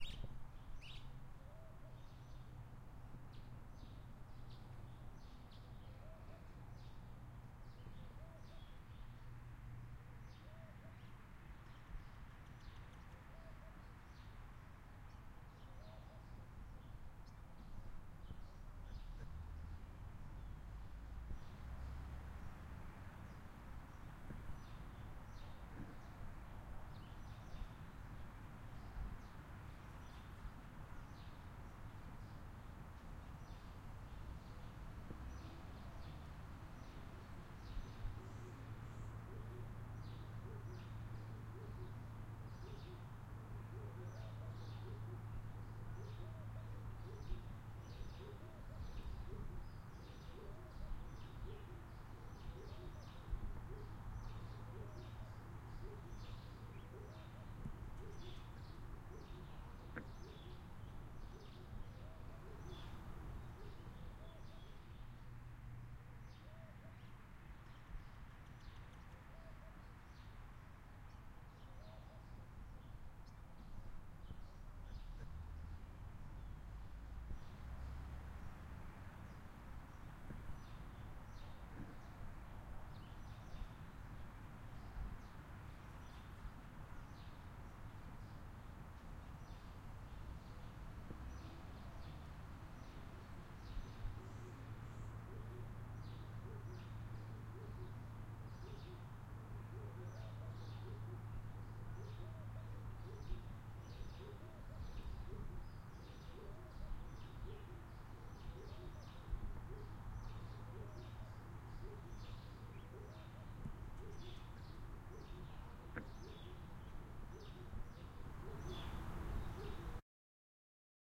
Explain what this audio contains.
Nature Day Ambiance
Sitting Outdoors in the garden among the birds and the trees
wind, OWI, nature, Outside, field-recording, birds, ambiance